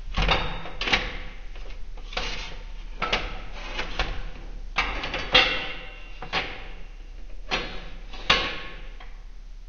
Playing with kitchen spoons slowed down. Reverb is natural